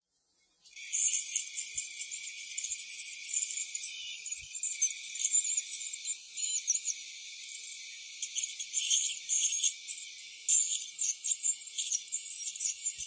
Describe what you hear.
Dentist-drill-fine
dentist drill recorded up close, clean/dry audio with high frequency content
clean, cranium, dentist, drill, dry, high-frequency, medical, real, skull, teeth, tools, tooth, uncomfortable, unpleasant, up-close